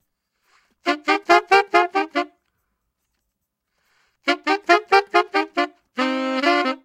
DM 140 CMin SAX LINE 2
DuB HiM Jungle onedrop rasta Rasta reggae Reggae roots Roots